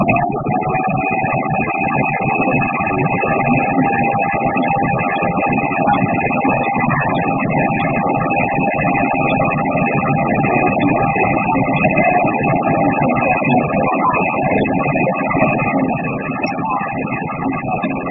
Webmic picking up ambient sounds (mostly the news on TV and the computer fan) put through a severe (but smooth sounding) digital distortion to the point of leaving the sound unrecognisable. Although digital distortion methods were used, the distorted sound is smooth, not the type of harsh, gritty distortion one would associate with digital distortion methods.